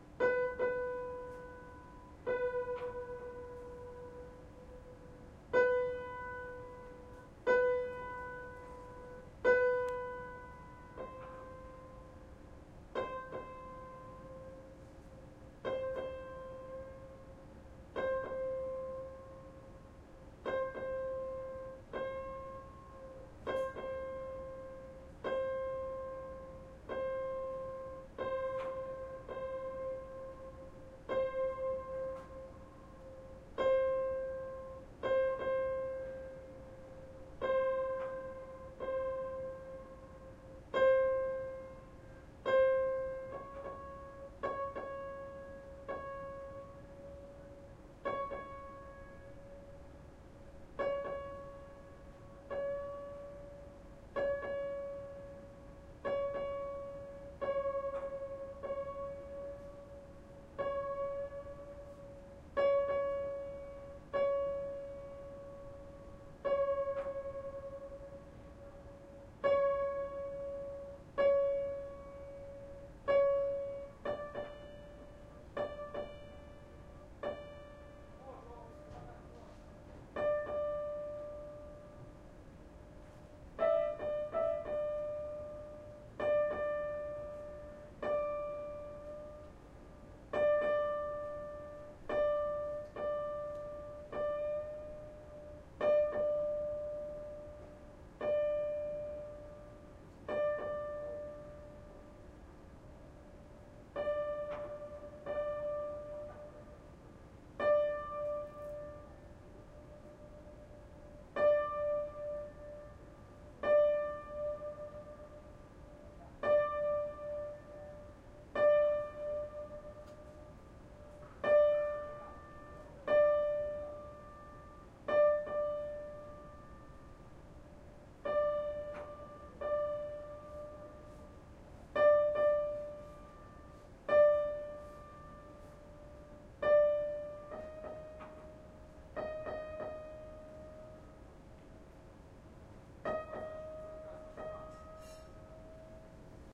081011 03 tuning piano background noise
piano tuner is working, closer recording than previous attempt, in front of Yamaha grand piano